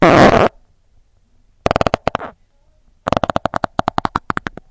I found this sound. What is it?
A sequence of small explosions from the gastric netherland recorded with a with a Samson USB microphone. Not to be confused with the country...
body, fart, human, sound